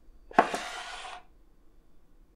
glass slide 04
sliding a glass across a table
glass, table